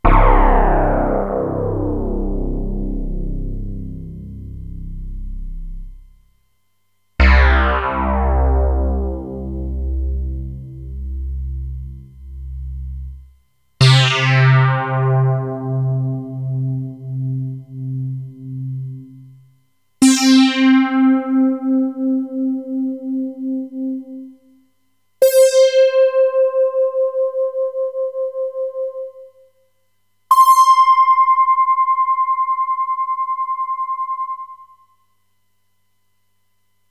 DX5 Arrow X
This is one of sampled instruments made on FM Synthesizer Yamaha DX-5